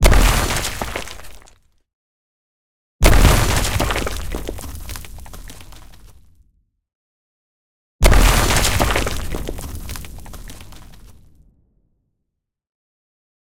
Explosion Debris Short Stereo
Explosions and Debris (x3). Edited version with sounds of my personnal library. The last one have a longer tail (<5sc).
Gear : Rode NTG4+, Tascam DR05, Zoom H5.
short, explode, explosion, explosive, boom, bang, detonate, bomb, detonation, debris